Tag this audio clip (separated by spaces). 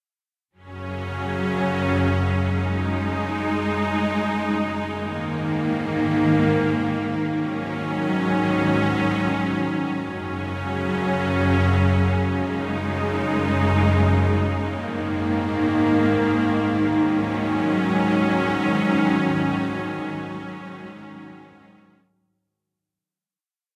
atmosphere dramatic spooky dark horror cinematic ambience pad trailer ambient thrill thriller film movie story drone music background-sound drama scary mood deep strings suspense soundscape hollywood background